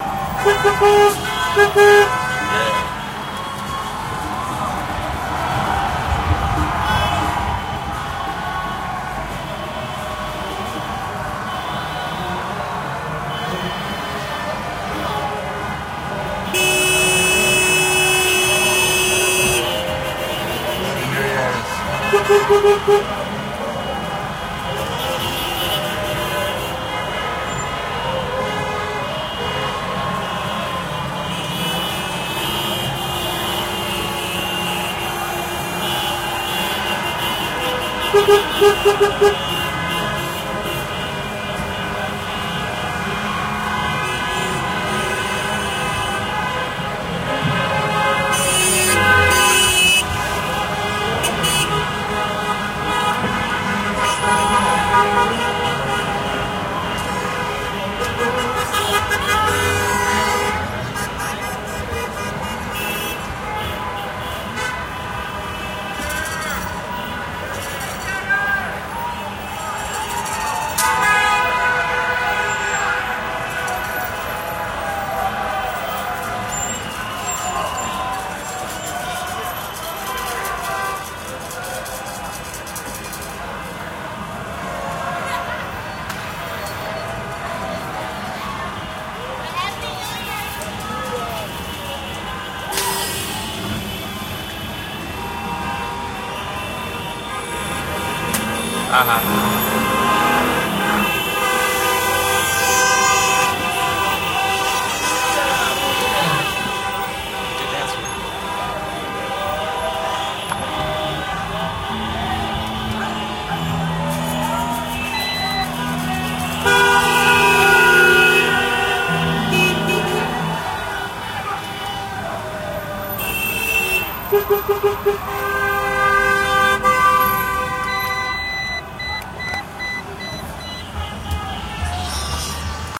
This is when 12am hit on New Years Eve in Hollywood, CA along the blvd. This is recorded from inside a vehicle as it rolled along for about a half mile.